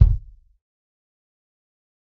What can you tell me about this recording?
Dirty Tony's Kick Drum Mx 040
This is the Dirty Tony's Kick Drum. He recorded it at Johnny's studio, the only studio with a hole in the wall!
It has been recorded with four mics, and this is the mix of all!
tony, pack, kit, dirty, raw, drum, tonys, realistic, punk, kick